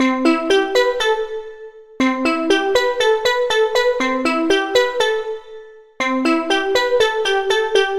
Tropical loop 1
Tropical style melody which can be looped.